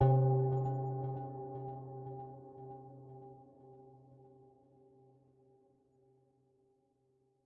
Bell Sound 140
I made this bell sound in FL Studio. Check my music here:
140, bell, bpm, fl, sound